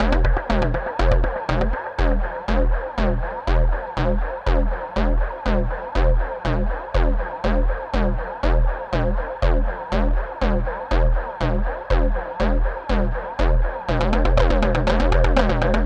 Nero Loop 22 - 120bpm
120bpm, Percussion